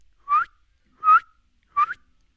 cartoon moviendose
moviendose de un lado al otro
rotate, moviendose, cartoon, girando